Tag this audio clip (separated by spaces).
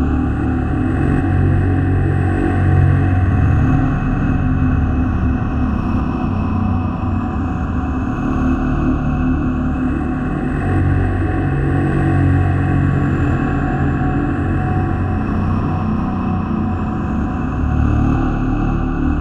ambient creepy horror loop scary sinister spooky suspense terrifying terror thrill weird